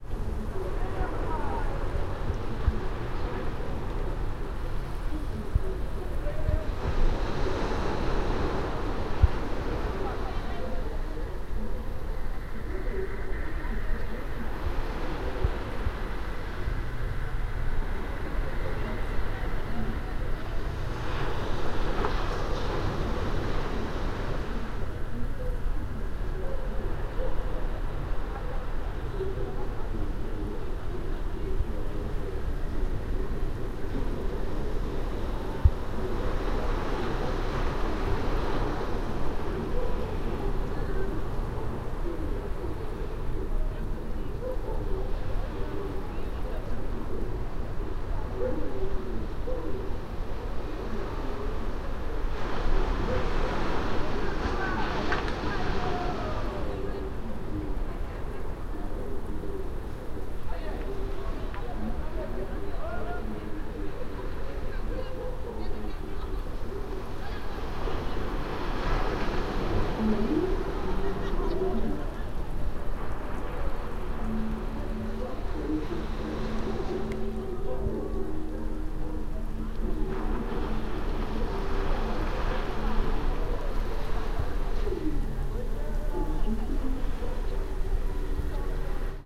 Hotel do Mar 2012-4
Hotel do Mar,Sesimbra, Portugal 19-Aug-2012 23:02, recorded with a Zoom H1, internal mic with standard windscreen.
Ambiance recording.
People are walking and talking and laughing in the promenade by the beach below my hotel room (approx 80-100m away).
Sound of sea waves and also the TVs from various rooms in the hotel.
night, sea, happy, laughing, Summer, ambiance, Portugal, August, night-walk, talking, Sesimbra, balcony, hotel, chatting, waves, distant